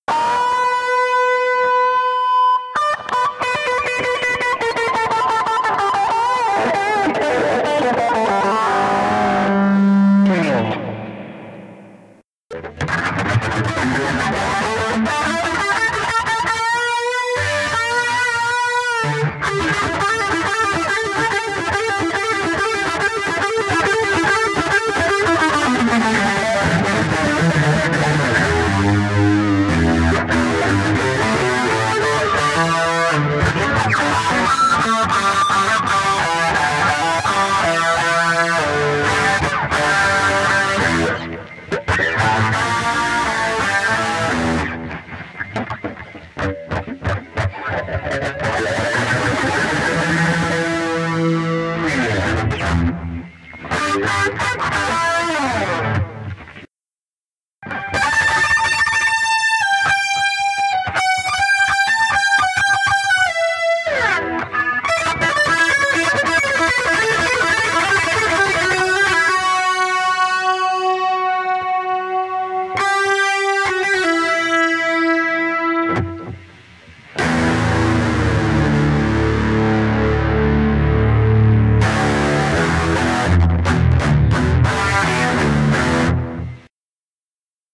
Lead Guitar Key of E
Blues, Classic, Traxis, House, Grunge, Beats, Synth, Bass, Free, Dub, Techno, Keyboards, BPM, Jam, Rock, Loops, Dubstep, Country, Backing, EDM, Music, Guitar, Rap